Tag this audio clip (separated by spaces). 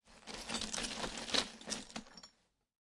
crawling glass sound-effect